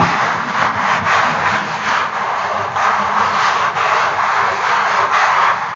Movement in the Dark
Created by dragging a piece of paper against my laptop, followed by some quite heavy EQ-editing in FL studio.